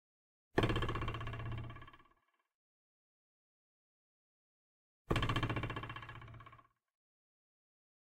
Cartoon 5'' Boing
Cartoon Boing Sound created with a ruler. The 101 Sound FX Collection
boing, cartoon, slide, slide-whistle, sproing, whistle